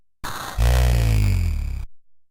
Circuit bent Two
low, circuit, artefacts, bent